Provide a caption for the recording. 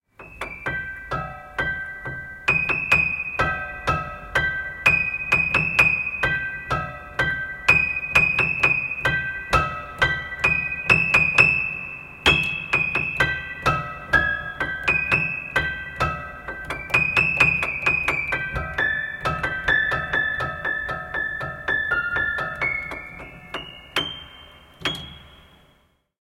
Detuned Piano HorrorToyPiano
sounds a bit like a toy piano
from series of broken piano recordings made with zoom h4n